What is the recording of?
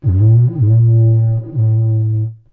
Cardboard tube from Christmas wrapping paper recorded with Behringer B1 through UB802 to Reaper and edited in Wavosaur. Edit in your own loop points if you dare. Fluctuating tonal catastrophe of cardboard proportions.